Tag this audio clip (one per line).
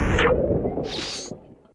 fx
effect
space
Yamaha-RM1x
strange
noise
sample
odd
atmospheric
weird
sci-fi